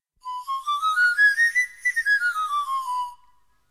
Viking pan pipes
age, ancient, iron